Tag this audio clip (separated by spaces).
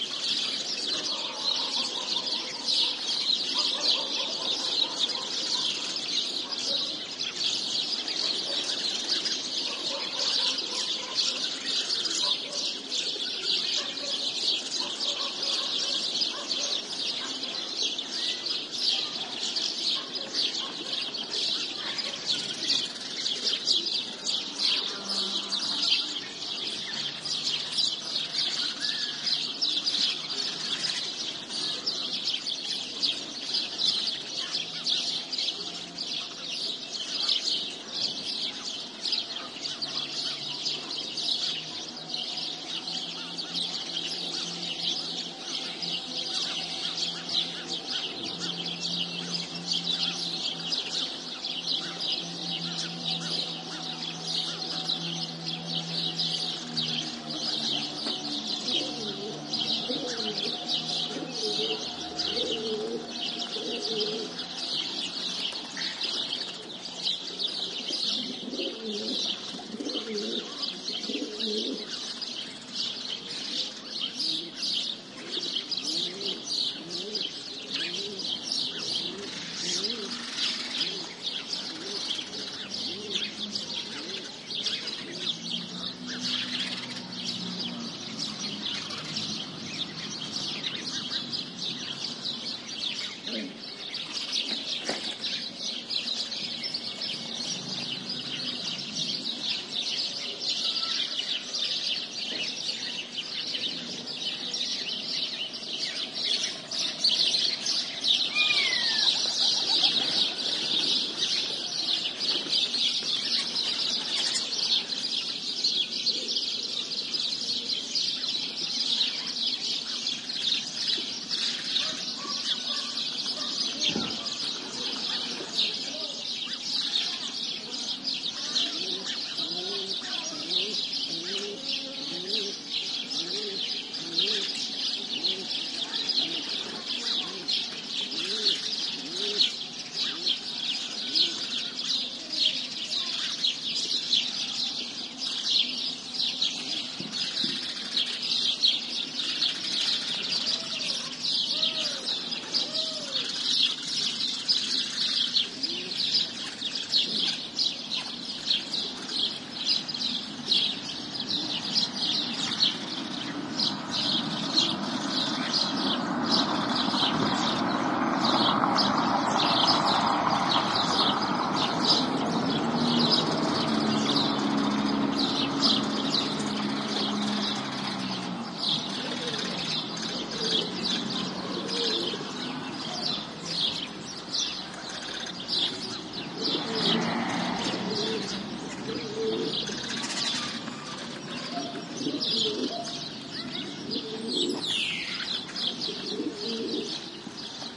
ambiance cooing country field-recording House-sparrow pigeons Spain village